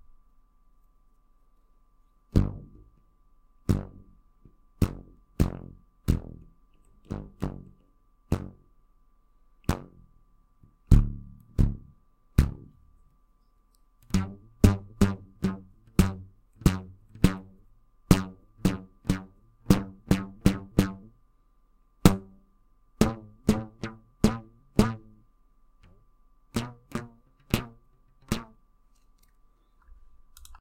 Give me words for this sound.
Elastic Pings 002
A weird sound i made with some elastic bands.
elastic, ping